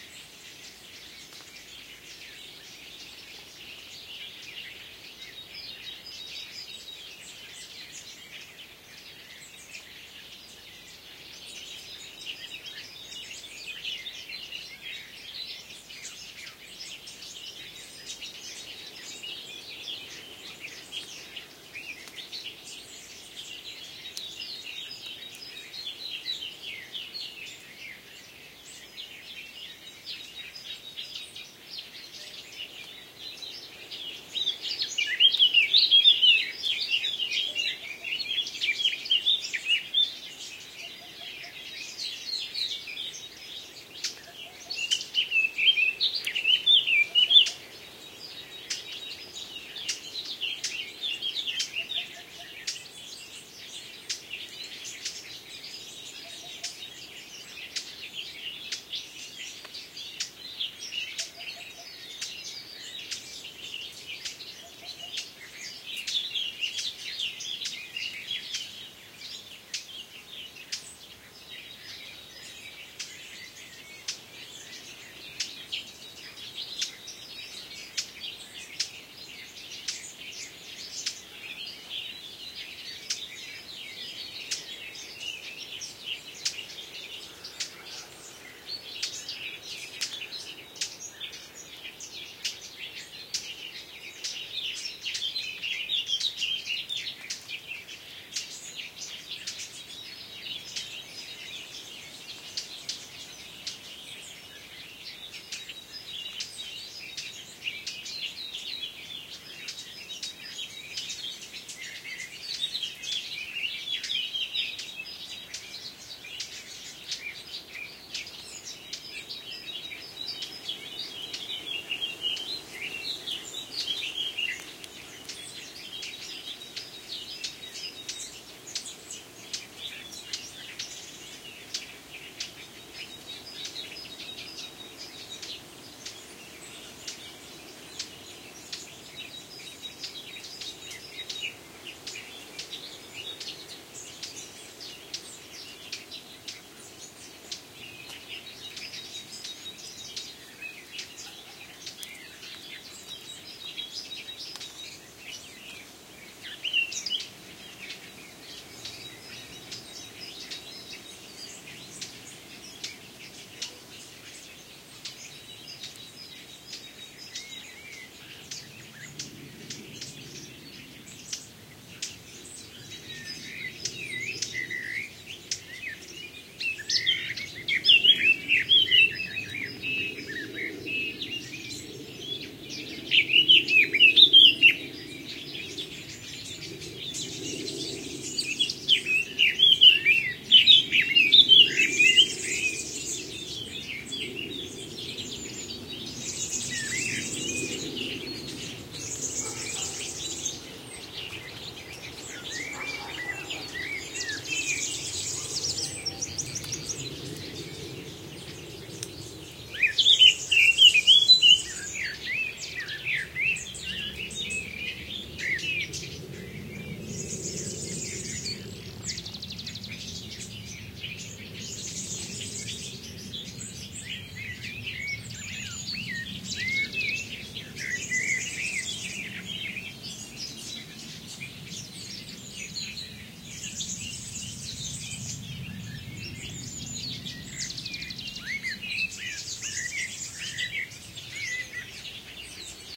field spring ambiance with lots of birds singing (hoopoe blackcap great-tit serin), occasional planes overheading and dogs barking. Recorded near Carcabuey (Cordoba, S Spain). These files named joyful.spring are cut from a single longer recording an can be pasted together